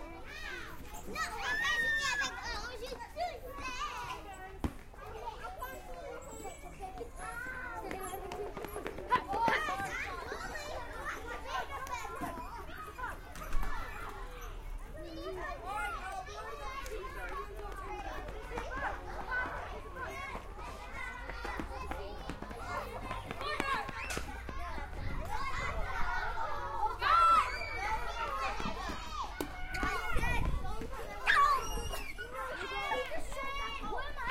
10:35 playground at ourSchool
playground, playing, school-yard, TCR